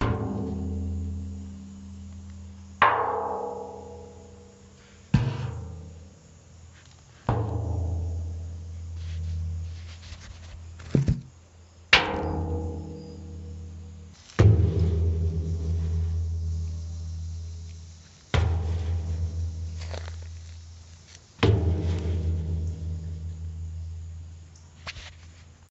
Percussion on an Old Empty Oil Drum
Hitting an old, empty oil drum lost in some old woods in various ways (on my palm, knuckle, with a stick, etc) to make different deep bass noises. Natural reverb since it was in a large open space.
big, drum, hit, metal, reverb, wood